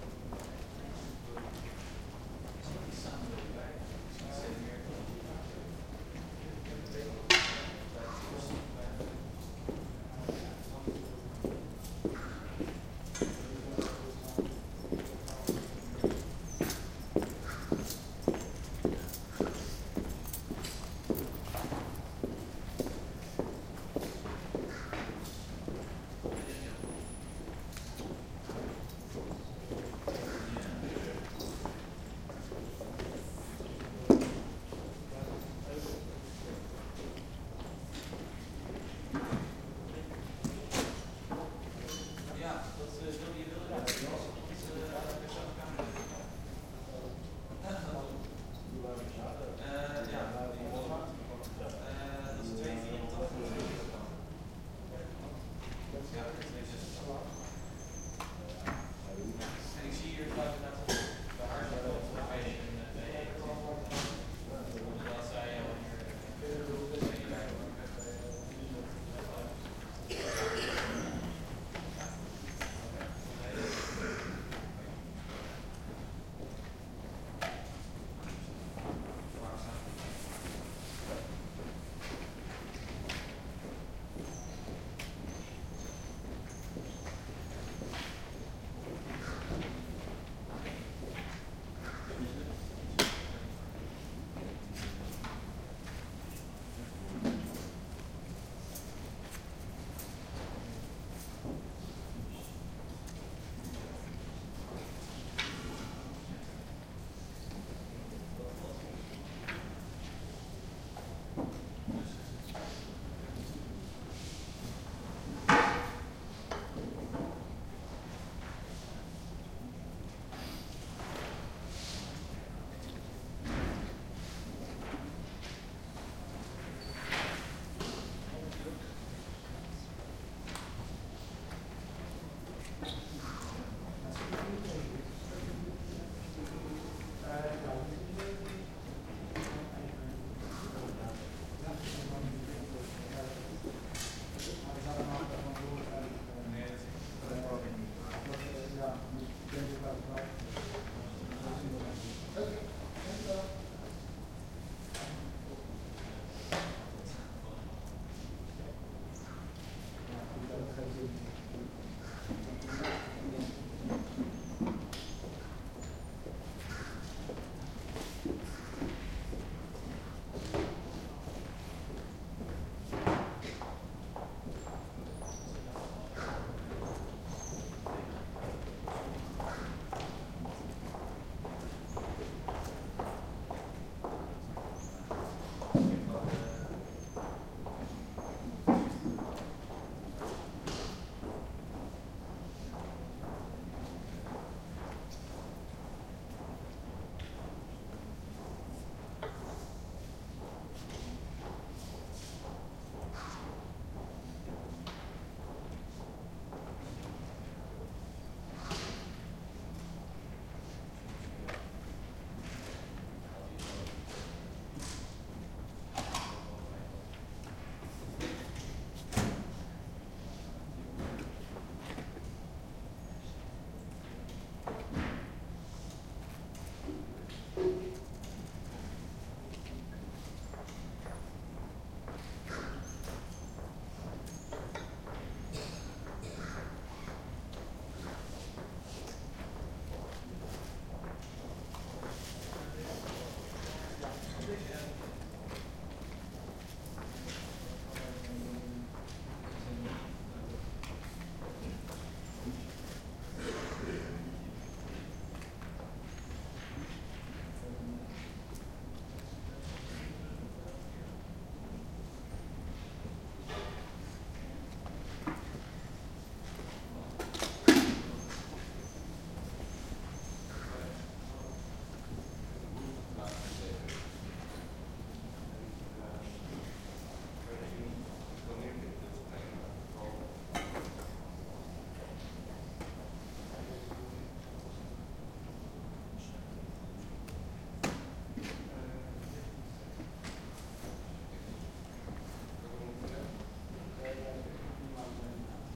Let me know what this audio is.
20121112 TU Delft Library, ground level - general ambience
The library at Dutch university TU Delft. Footsteps, door sounds, english and dutch voices. Recorded with a Zoom H2 (front mikes).
ambience, big-space, field-recording, library, netherlands, neutral, public-building, university, zoom-h2